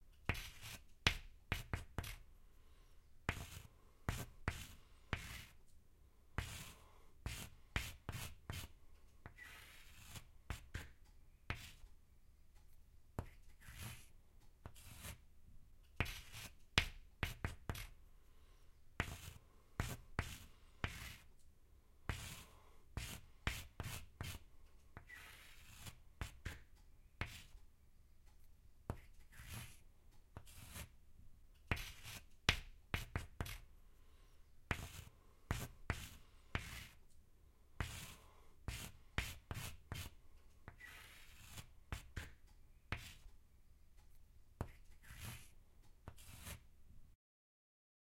Writing With Chalk
I recorded someone writing on a chalk board
Chalk, OWI, Writing